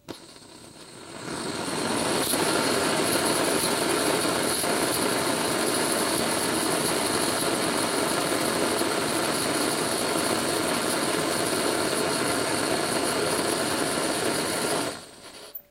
Mono recording of a gas burner being turned on, lit and turned off.
Sounds like fluttering noise with some hissing sounds.
burner, burning, fire, gas, noise